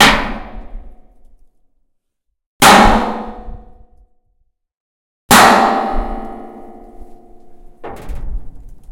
record in garage
core, drum, garage, iron, recorder, snare